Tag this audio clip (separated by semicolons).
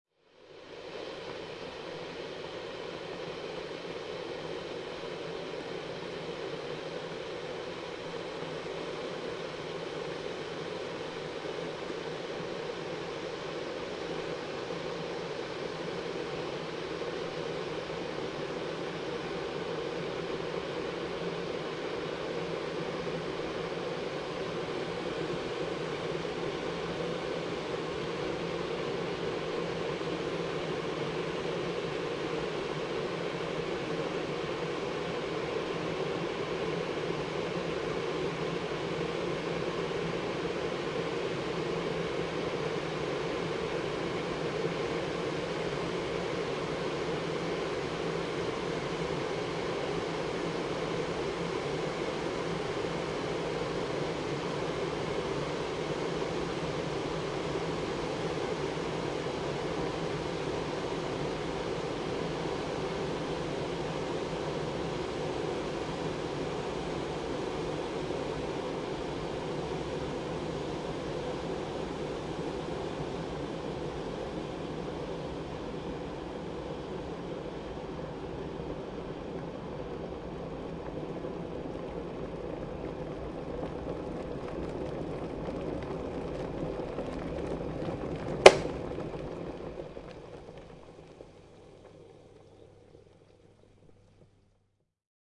boiling; water; watercooker